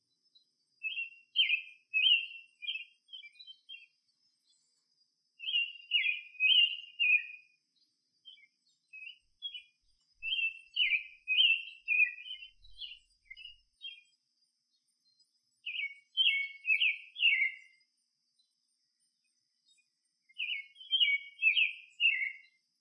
Birds singing at 5 a.m. in my backyard in Virginia.
ambient, Birds, field, morning, nature, recording